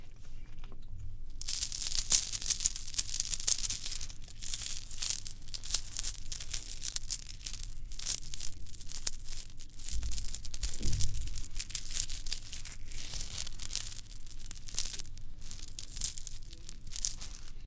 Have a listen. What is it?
microfone condensador, cardióide/ fonte do som: pessoa mexendo o braço com pulseiras.